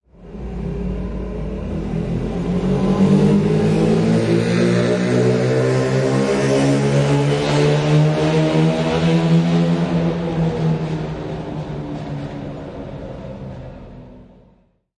MCycle 1 motorcycle driving away
Motorbike driving away
away, beach, doppler, driving, engine, field-recording, great-yarmouth, holiday, motorbike, motorcycle, nnsac, seasideresort, traffic, yarmouth